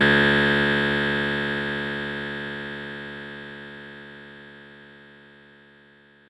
fdbck50xf49delay14ms
A 14 ms delay effect with strong feedback and applied to the sound of snapping ones fingers once.